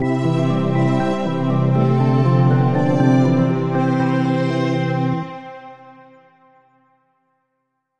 short loops 06 03 2015 4
made in ableton live 9 lite
- vst plugins : Alchemy, efthimia, Prodigious, Microorgan MKIII/5 - All free VST Instruments from vstplanet !
- midi instrument ; novation launchkey 49 midi keyboard
you may also alter/reverse/adjust whatever in any editor
gameloop game music loop games organ sound melody tune synth gameover endgame
endgame, game, gameloop, loop, melody, synth, tune